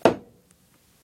Hammer Hit 5
Several hits (on wood) with a medium-sized hammer.
hammer, hammering, hit, tap